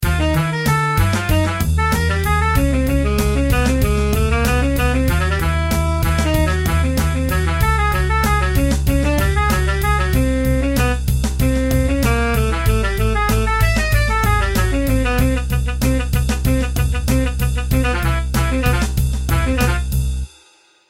Sax solo
sax, drums, bass, impromptu, MIDI
bass, sax, drums, MIDI, impromtu